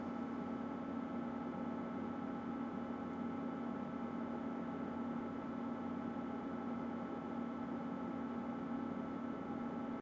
0101 DVW500 int idle
DVW500 in standby-off mode, idling.
This sample is part of a set featuring the interior of a Sony DVW500 digital video tape recorder with a tape loaded and performing various playback operations.
Recorded with a pair of Soundman OKMII mics inserted into the unit via the cassette-slot.
digital, mechanical, vtr, field-recording, video, cue, recorder, shuttle, dvw500, player, eject, jog, technology, machine, sony, electric